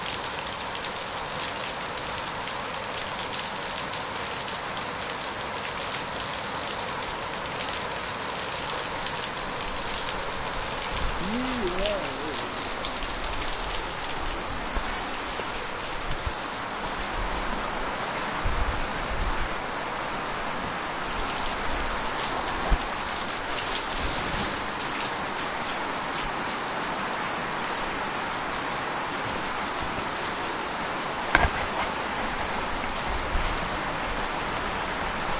Bahnhof Regen, Stark, Wassergeplätscher vom Dach
Record with Olympus LS-11 on a little trainstation
trainstation, heavy-rain, swizerland, fial-recording